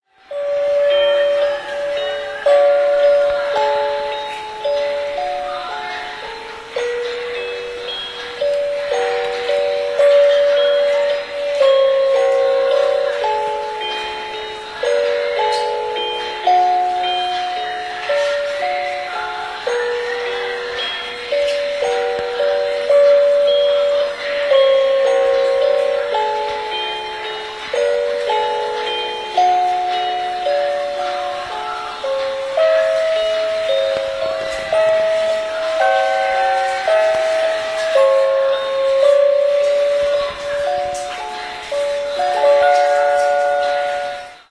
christmas decoration praktiker191210
19.12.2010: about 19.00. Praktiker supermarket in M1 Commercial Center in Poznan on Szwajcarska street. The sound of christmas decoration.